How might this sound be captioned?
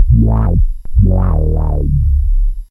handplayed bass sounds on a korg polysix. without space between
bassline, korg, synthe